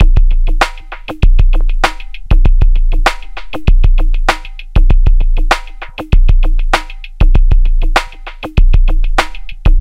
abstract-electrofunkbreakbeats 098bpm-makeyamove
this pack contain some electrofunk breakbeats sequenced with various drum machines, further processing in editor, tempo (labeled with the file-name) range from 70 to 178 bpm, (acidized wave files)
abstract, beat, breakbeats, chill, club, distorsion, dj, dontempo, downbeat, drum, drum-machine, electro, elektro, experiment, filter, funk, hard, heavy, hiphop, loop, percussion, phat, processed, producer, programmed, reverb, rhytyhm, slow, soundesign